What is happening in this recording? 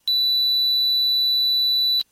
sample of gameboy with 32mb card and i kimu software
layer, boy, game